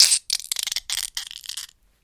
Squlelch Crackle
Squelchy beatbox crackle
beatbox, dare-19, creative, hit